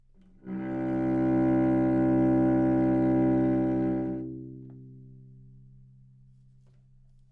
Cello - C2 - other
Part of the Good-sounds dataset of monophonic instrumental sounds.
instrument::cello
note::C
octave::2
midi note::24
good-sounds-id::239
dynamic_level::p
Recorded for experimental purposes